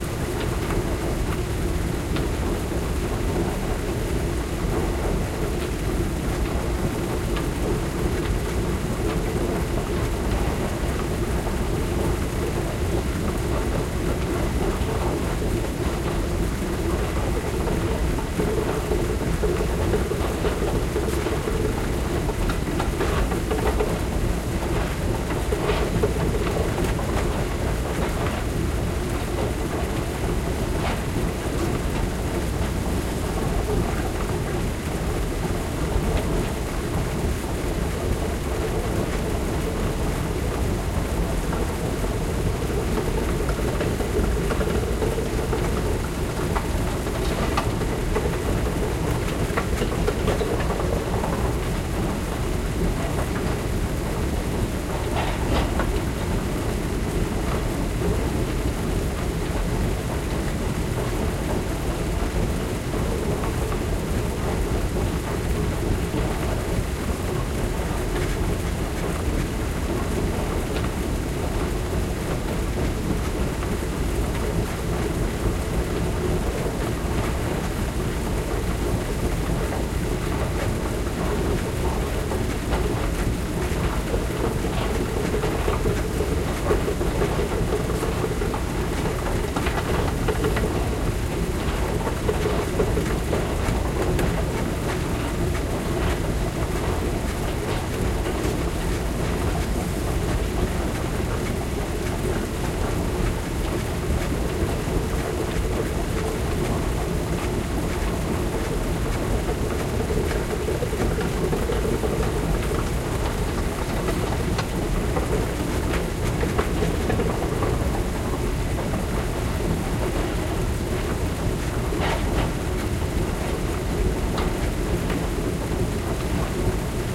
Sound from subway escalator in Decatur MARTA station, Atlanta, GA, USA. Recorded on November 24, 2016 with a Zoom H1 Handy Recorder. The recorder is positioned on the metallic floor of the escalator.